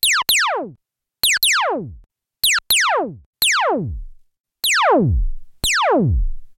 Laser shots
Created using a Korg Monotribe.
Edited using and transcoded using ocenaudio.
Look here for more similar sounds:
arcade, beam, blast, blaster, burst, cannon, fire, firing, gun, phaser, phasers, retro, robot, sci-fi, shooting, shot, space, weapon